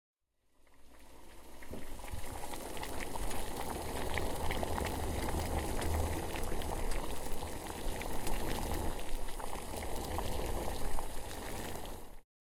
Miked at 6-8" distance.
Water boiled in ~6" diameter pot.